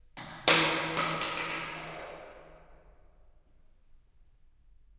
Small Plastic Object Dropped in Large Concrete Basement
Plastic object falling on a stone floor in an empty bassment. Recorded in stereo with RODE NT4 + ZOOM H4.
room, church, dropping, clang, drops, hit, hitting, basement, smash, echo, metal, drop, klang, plastic, pipe